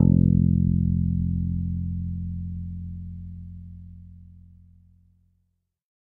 First octave note.
electric, bass, multisample, guitar